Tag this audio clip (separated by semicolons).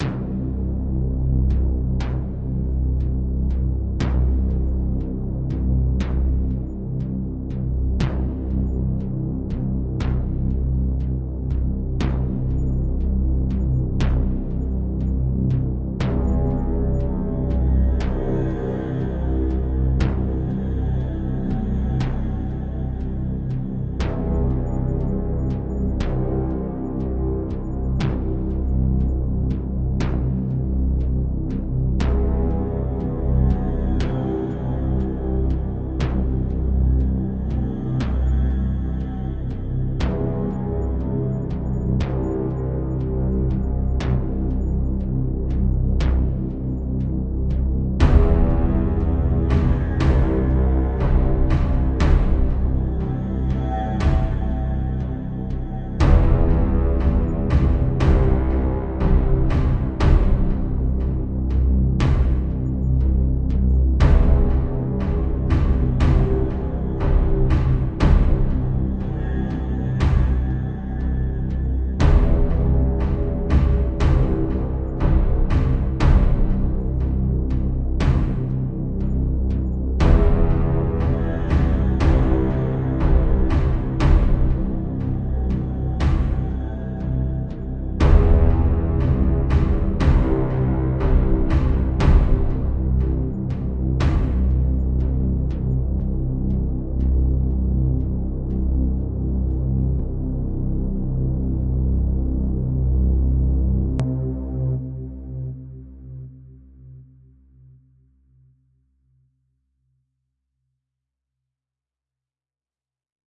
War; Horror; Movie; Suspense; Scary; Electronic; Drum; Deep; Cinematic; Sound; Bass